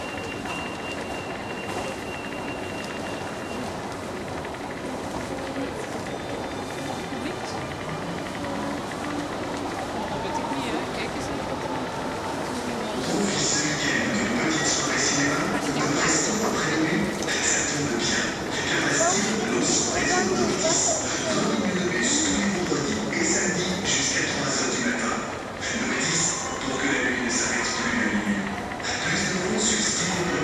Brussels subway 2
Brussels subway metro underground
underground, Brussels, subway